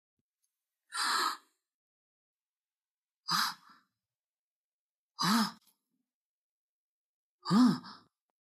oh - Startled surprise
Sounds recorded for a personal project. I recorded myself doing different kinds of low, soft surprise sounds and shifted the pitch to a more female voice range.
awe, female, oh, surprise, voice, woman